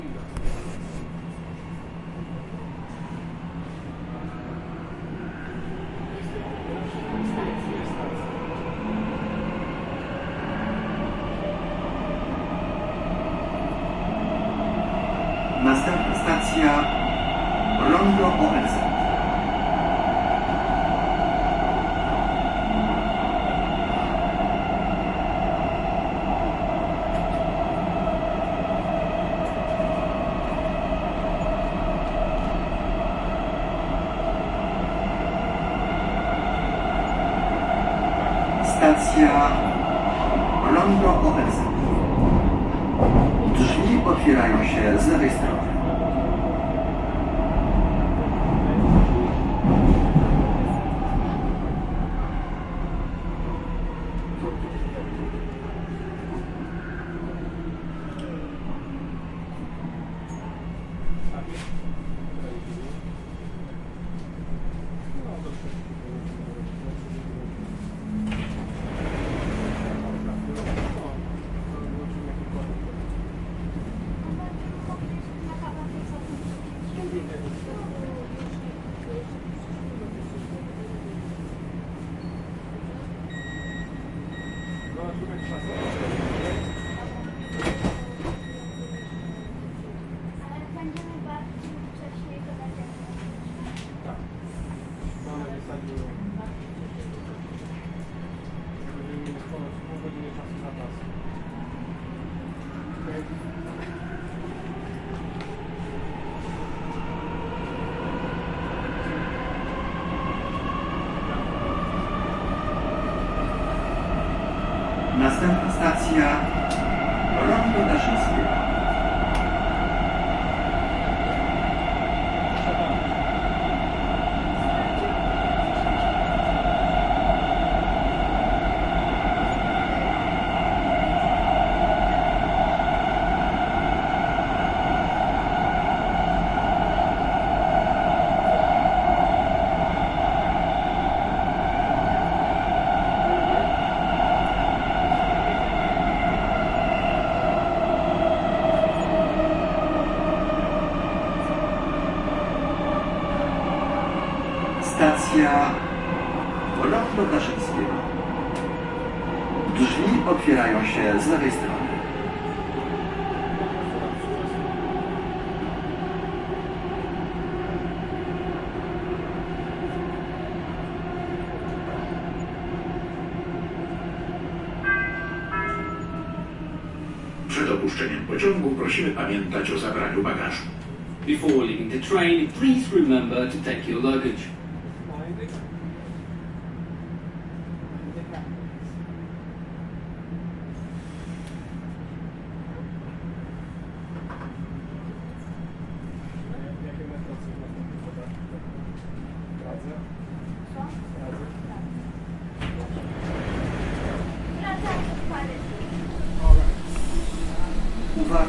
Warsaw metro
Unprocessed stereo recording inside a metro in Warsaw. Recorded with a zoom H4n.
ambience, atmosphere, field-recording, h4n, metro, stereo, unprocessed